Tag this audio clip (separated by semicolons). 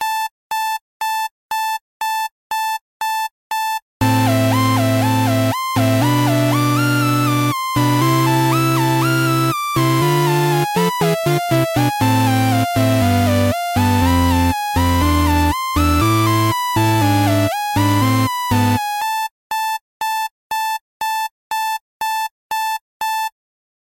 8-bit; alarm; beep; clock; electronic; morning; music; synth; synthesizer; wake